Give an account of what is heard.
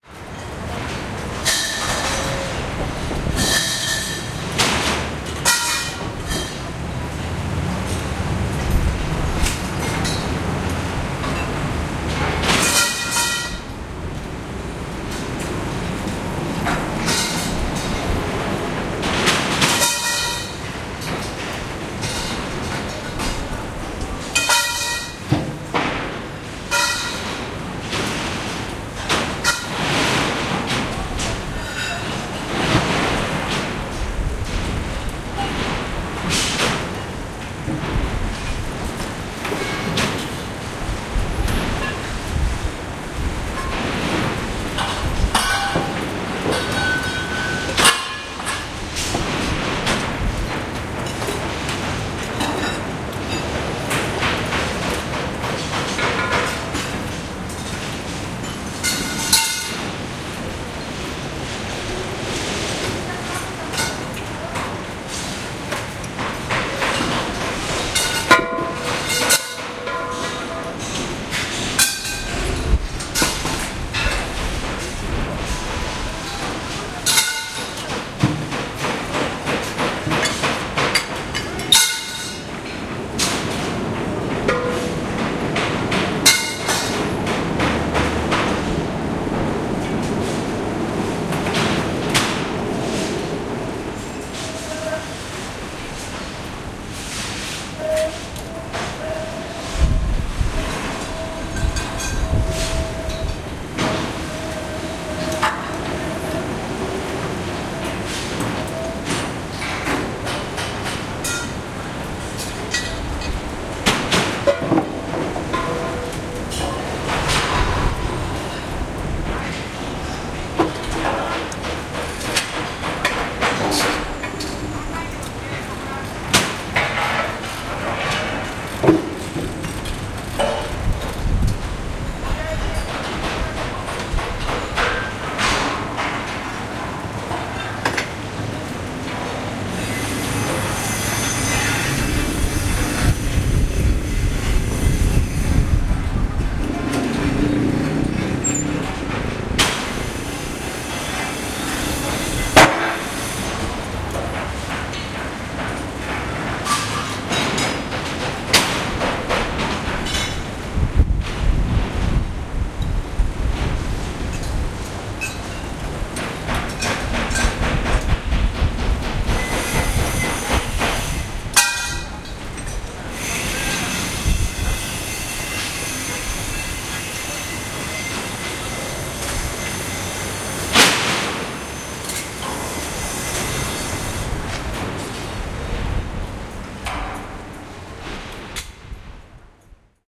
Tokyo Construction Site
Recording of an active construction site in the Ginza area of Tokyo.
Perhaps because it is Japan, the construction workers work silently, without a voice heard or a radio played.
Mini-disc